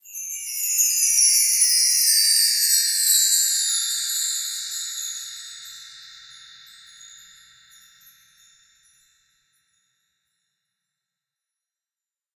Bar Chimes Sweep Down
A sweep from high to low on a set of tree bar chimes.
bell, chimes, concert, orchestral, percussion, symphony